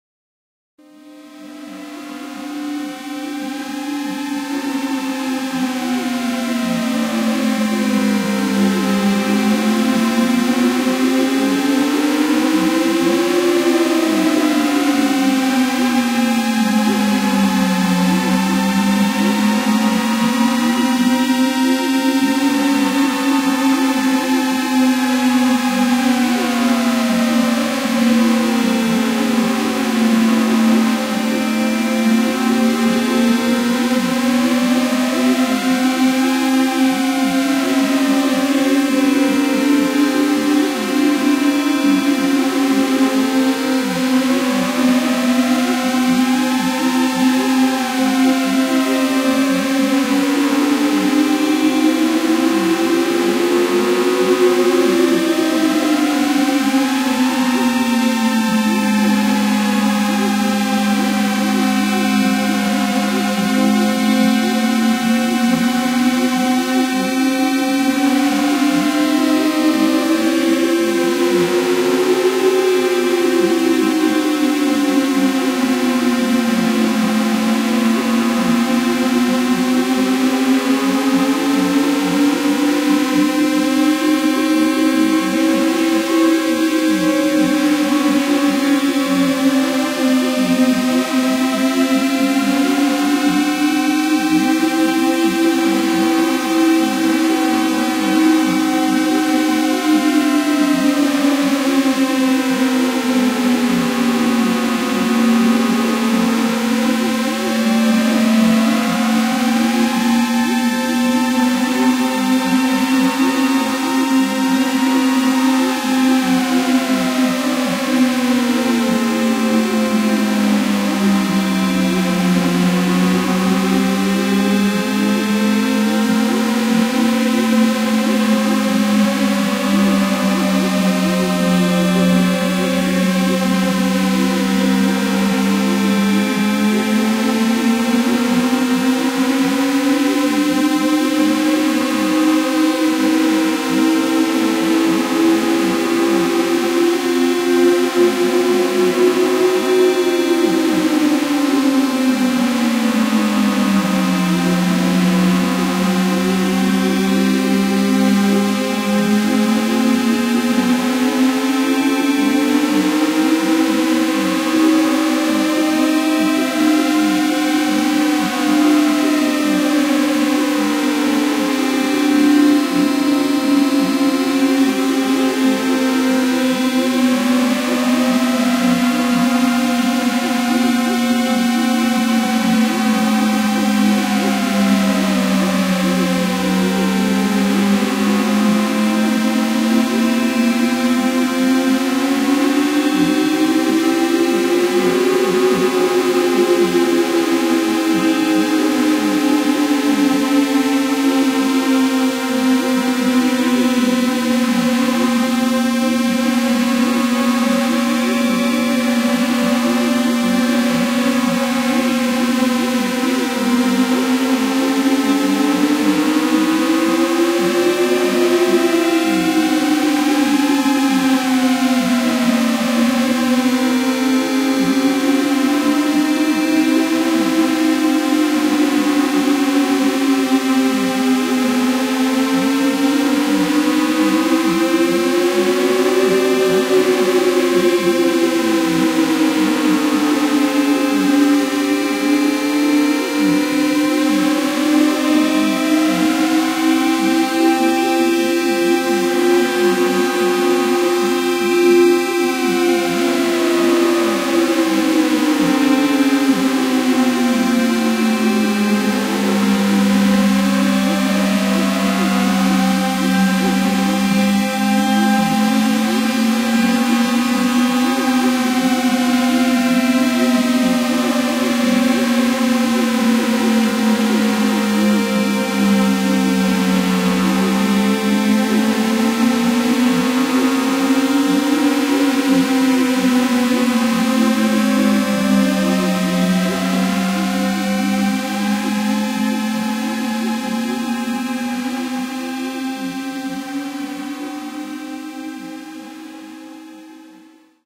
Korg Electribe 2 recorded with a Zoom H-5.
Minimal processing and conversion in ocenaudio.
More drones:
50s, 60s, 70s, atmosphere, creepy, dark, drone, experimental, fx, groovebox, haunted, horror, radiophonic, scary, science-fiction, sci-fi, sinister, space, spooky, synthesizer, terrifying, terror, weird